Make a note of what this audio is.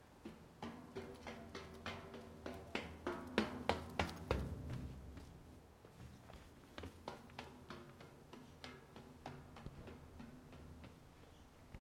Up Metal Stairs Down Metal Stairs
Walking up and down a metal staircase once, the sounds fades and gets louder, there are also some footsteps between
stereo, echo, fading, metallic, stainless-steel, staircase, metal